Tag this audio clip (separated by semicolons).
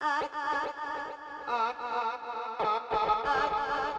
synth; pad